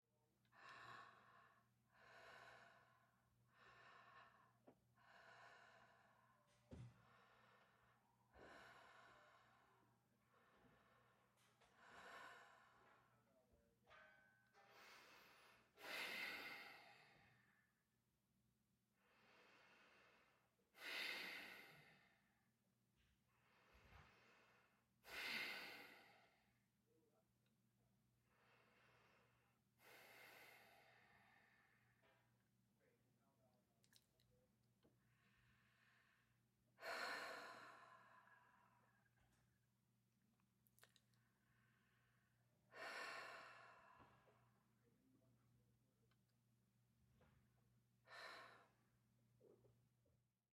Woman, female, inhale, exhale, sigh, breathing
Middle-aged woman breathing and sighing